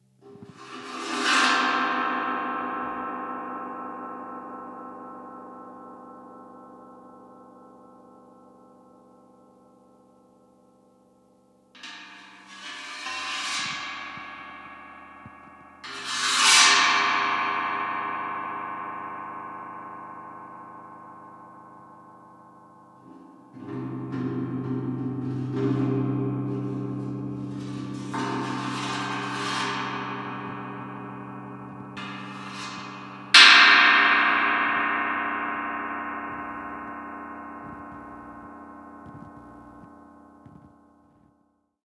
Scrapes and bonks on a big gong.
gong, scrapes, metal, cymbal